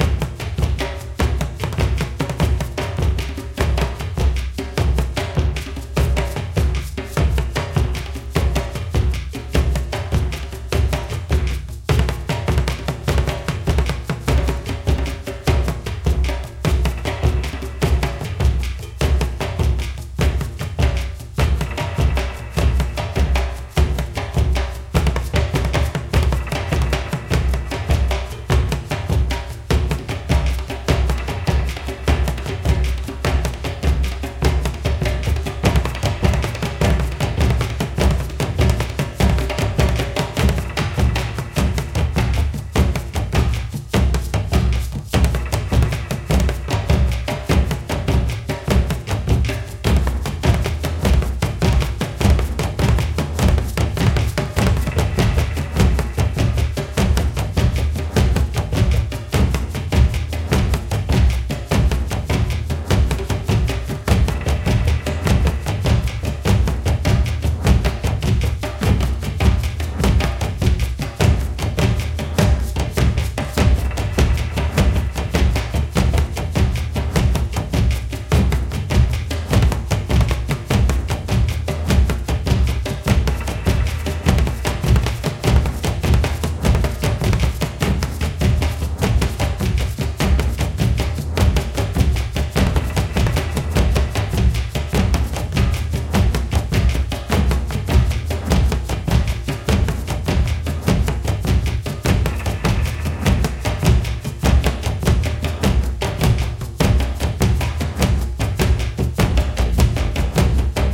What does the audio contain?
This is a multi-ethnic percussion loop designed to be cut-and-pasted in order to provide a variable drum backing track. The loop uses percussion instruments from a variety of cultures. A Native American shaman drum marks the beat, and serves as a cue for cutting the loop into sections. Each section is unique, providing different patterns of beats. Enjoy!